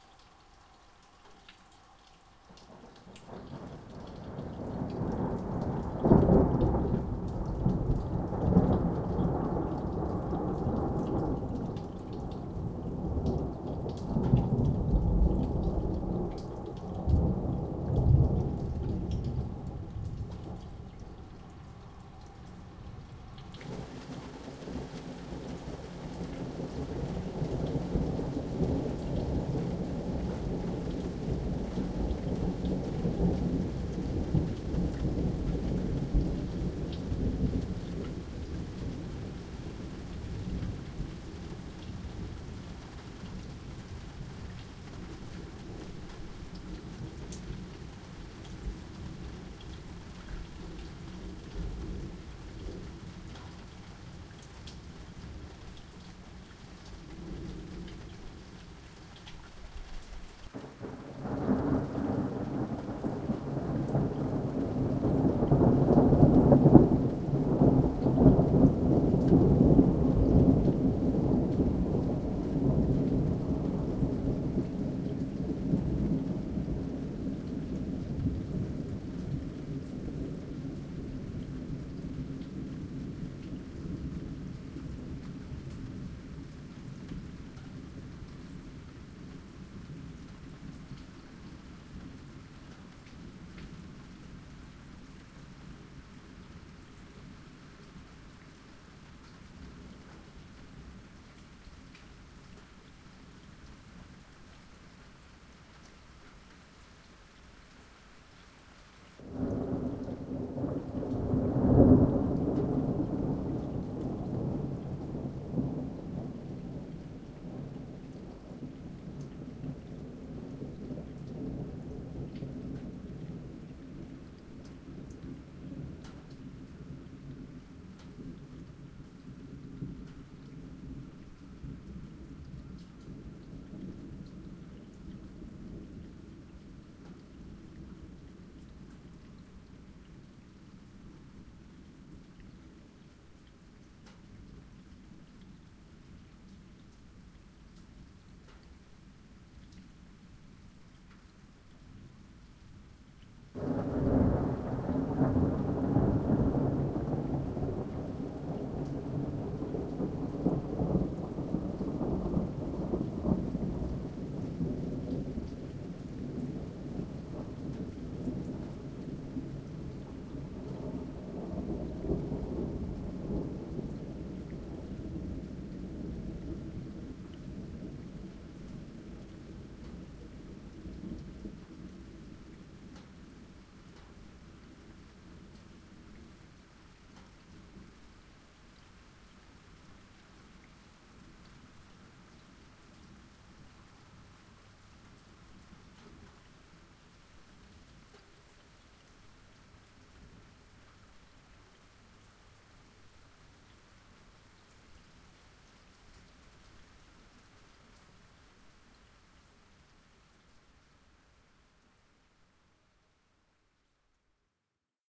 Rumblings of thunder
Thunder rumbling on. Recorded with Zoom H2
storm, weather, thunder, rain, Rolling